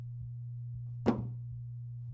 book hitting floor
book being dropped onto the floor.